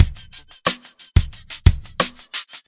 714break 019A 090bpm
1 of 3 variations on drum loop 090 bpm. created on ensoniq eps 16+ sampler.
90, bpm, drum, ensoniq, loop, sampler, stick